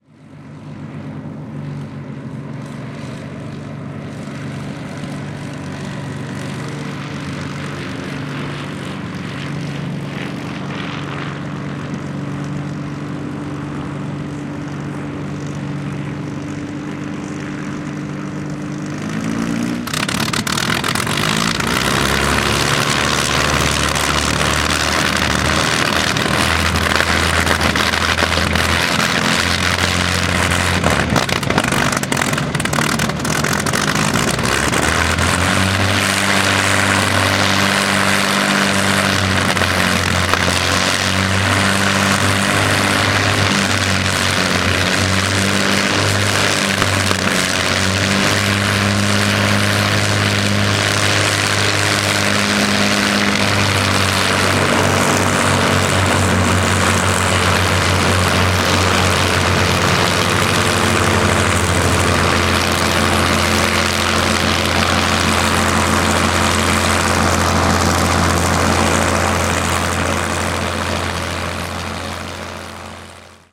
Bf-109 - Daimler-Benz Run-Up
You won't here this sound many other places! This is a Messerschmitt Bf-109E-3 that taxied right next to my location and proceeded to do an engine run-up right next to me. It starts out pretty rough but eventually smooths out.